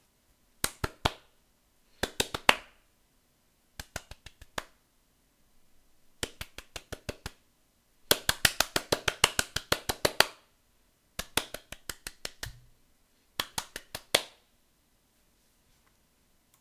Single applause
Single short applause recorded with a TBones SC 440